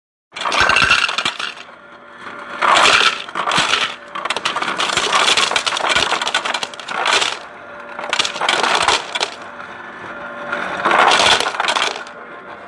Ice being dispensed from a fridge.